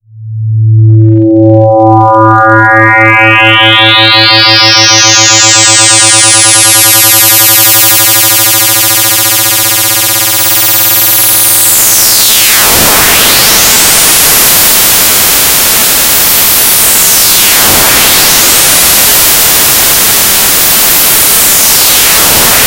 from A 110hz sine wave, to vibrating, to more and more chaotic
made from 2 sine oscillator frequency modulating each other and some variable controls.
programmed in ChucK programming language.
chaos, chuck, programming, sci-fi, sine